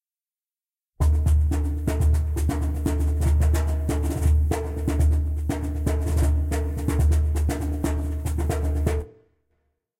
LoFi Djembe Grooves I made, enjoy for whatever. Just send me a link to what project you use them for thanks.